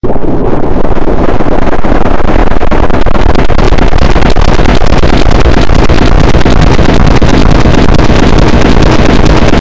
glitch horseman
A weird glitchy loop that sounds almost like a horseman riding a horse through a place that sounds creepy.